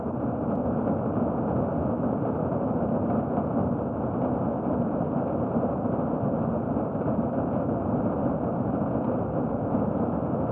INT Rainy ambience (rain heard from inside a room)
Sound of the rain as heard through walls in an interior environment. Heavily processed with Audacity, but should sound nicely realistic and should loop well.